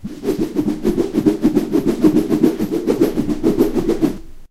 Multi-Swing Bamboo Staff Weapon Attack
I use a bamboo stick to generate a multi wind *swash* sounds.
This recording was made with a Zoom H2.
air, attack, bamboo, cut, domain, flup, h2, luft, public, punch, stick, swash, swhish, swing, swish, swoosh, swosh, weapon, whip, whoosh, wind, wisch, wish, woosh, zoom, zoom-h2